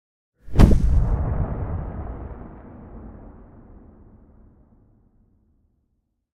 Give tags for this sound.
Enchant; Explosion; Magic; Spell

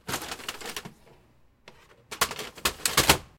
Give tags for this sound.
printer; paper; insert; load; tray; office; click

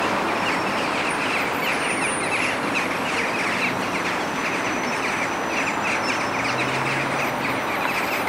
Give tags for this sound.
ambient; birds; town; traffic; noise; city; soundscape; flock; ambiance; field-recording; ambience; atmosphere

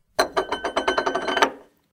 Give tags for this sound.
bowl; ceramic; plate; spin; topple

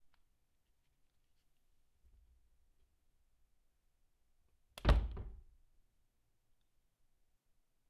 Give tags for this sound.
wardrobe; closing; close; wood; door

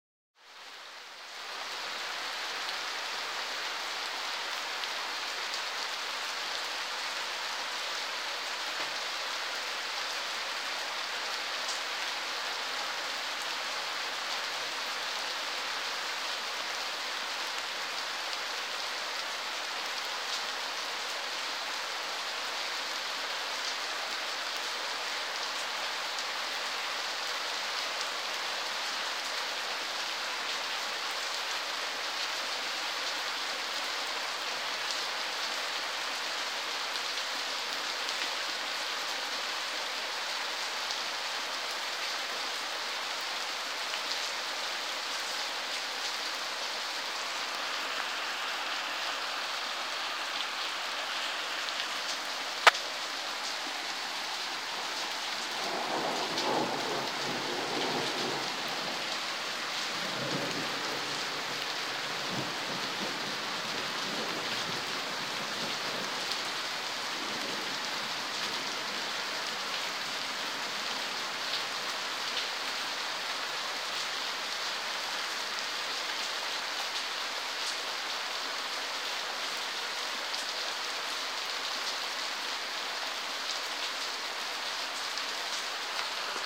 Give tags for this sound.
smartphone; field-recording; leaves; garden; Rain

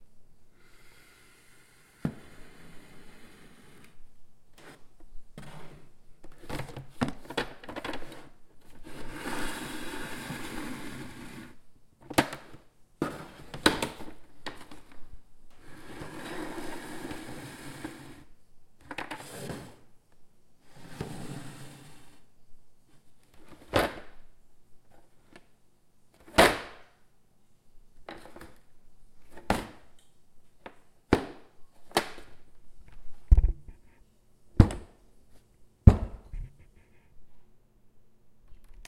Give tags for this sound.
bang; bucket; drag; drop; plastic; plastic-bucket

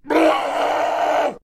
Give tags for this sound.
alien; crazy; criminal; left4dead

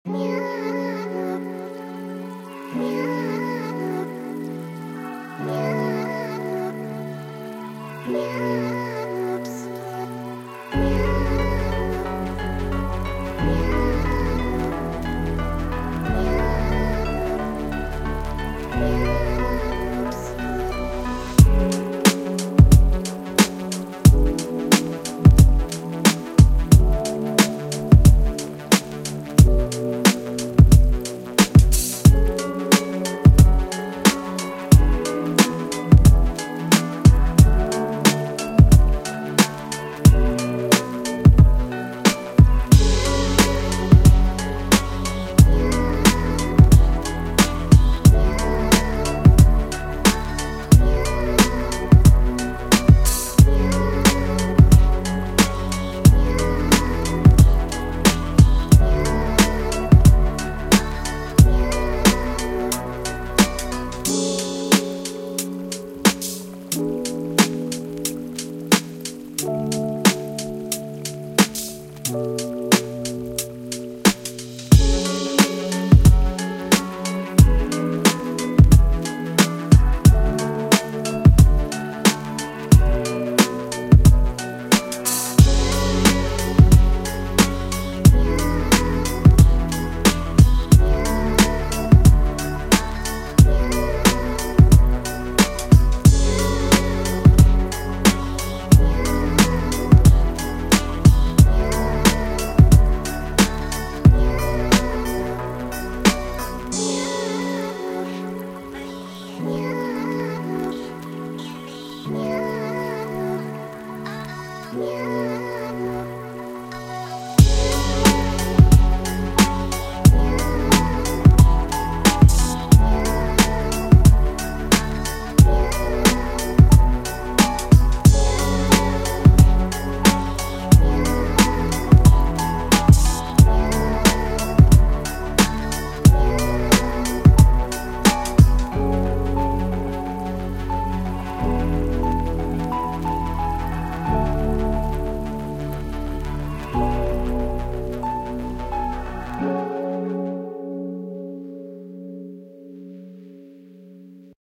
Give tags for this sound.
music; relaxing; atmosphere; lofi

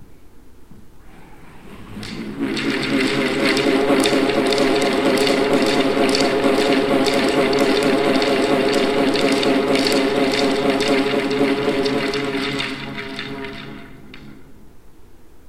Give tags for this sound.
air; propeller; rubber; bullroarer; band; blades; turbine; fan; rotor; rotate

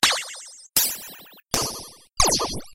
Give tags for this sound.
spring; dizzy; damage; game; hit; impact; cartoon

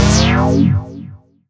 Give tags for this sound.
blaster; futuristic; game; gun; laser; sci-fi; sfx; shoot; shot; weapon

